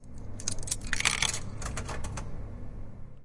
Insert Coin Drink Vending 2
Metalic sound of inserting a coin inside a drink vending machine in 'Tallers' area.
campus-upf, coin, drink-machine, drink-vending, insert-coin, metalic-sound, UPF-CS14